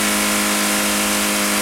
Loopable clip featuring a Mercedes-Benz 190E-16V at approximately 6500RPM at full engine load. Mic'd with a DPA 4062 taped to the radiator support above the driver's side headlight.
benz, car, dynamometer, dyno, engine, mercedes, vehicle, vroom